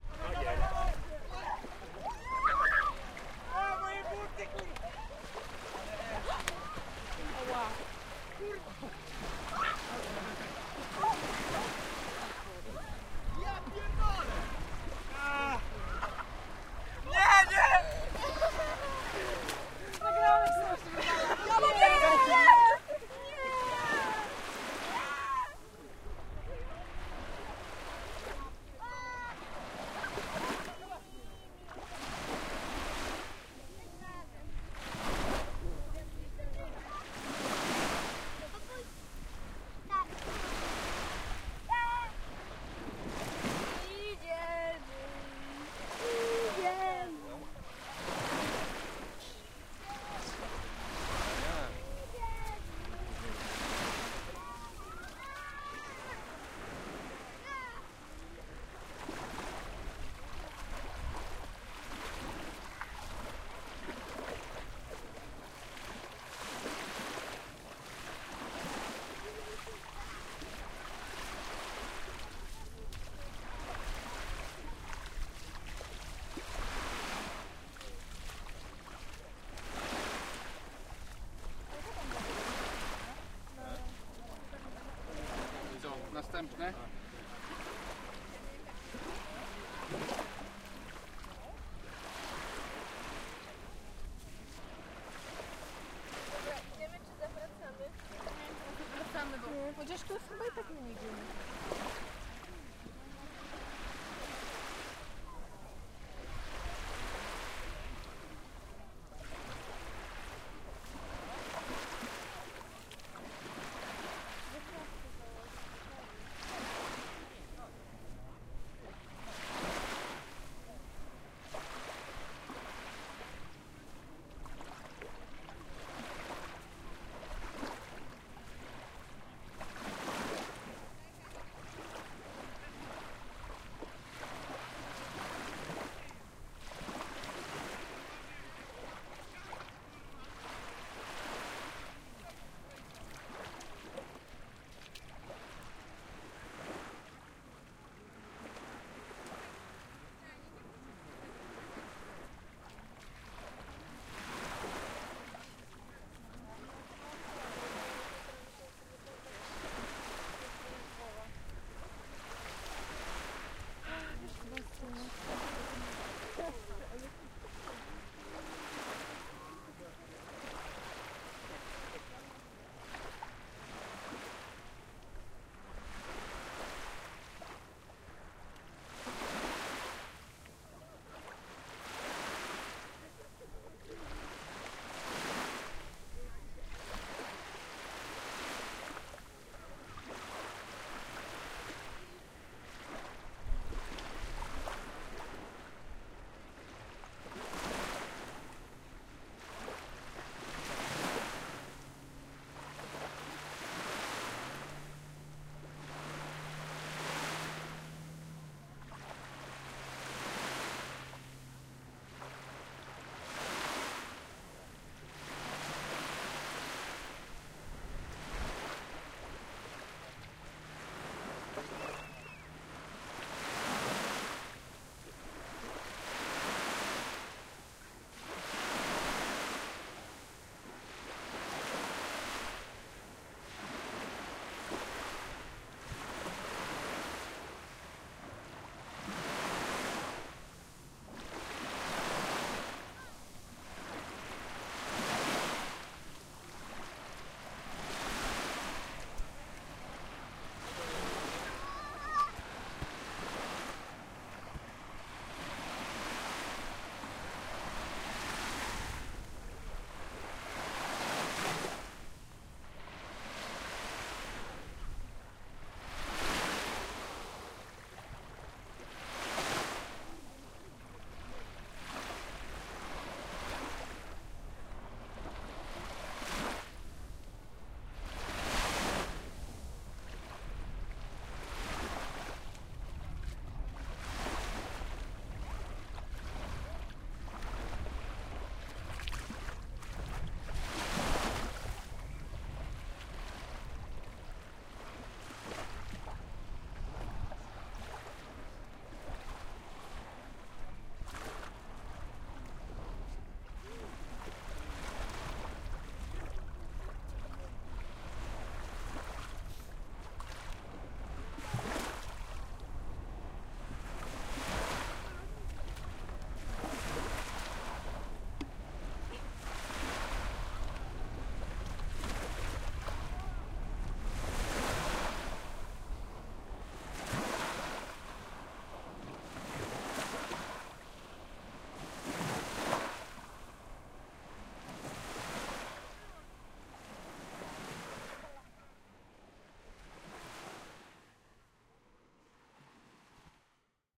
Baltic Sea Sound May 2022
Walking on the beach between Gdynia and Sopot. Baltic Sea May 2022. Sounds from the beach. Voices of children laughing. A group of youngsters throws a friend in his clothes into the sea. The sound of waves. Hustle and bustle of children and people.